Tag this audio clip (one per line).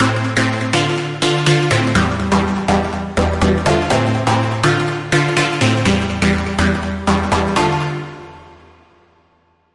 sample
club
pbm
disco
move
background
part
music
loop
techno
stabs
jingle
stereo
mix
broadcast
sound
podcast
radio
pattern
dancing
drop
instrumental
trailer
dance
beat
chord
dj
interlude
trance
intro